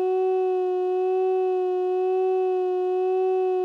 The vowel "O" ordered within a standard scale of one octave starting with root.
o, voice, vowel, supercollider, speech, formant